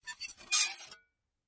Small glass plates being scraped against each other. Noisy and rough yet pitched. Close miked with Rode NT-5s in X-Y configuration. Trimmed, DC removed, and normalized to -6 dB.